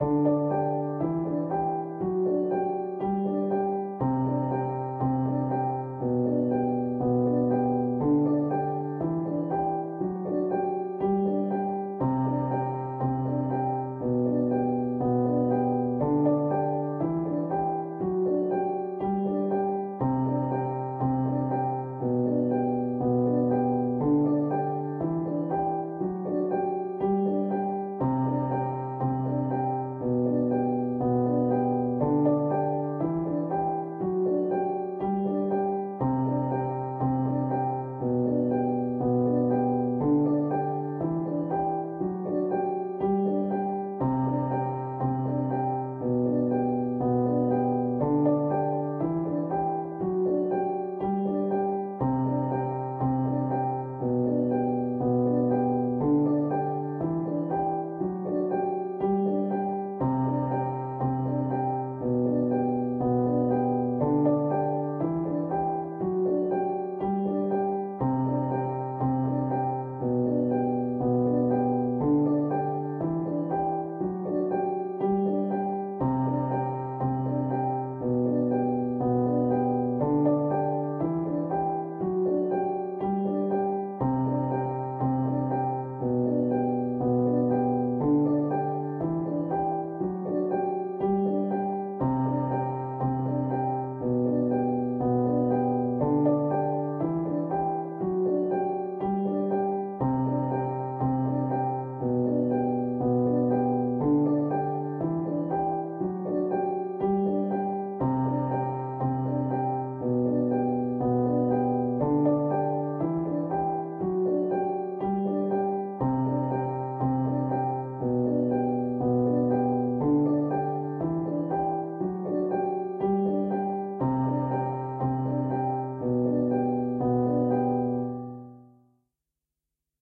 Piano loops 055 octave long loop 120 bpm
120, 120bpm, bpm, free, loop, Piano, pianomusic, reverb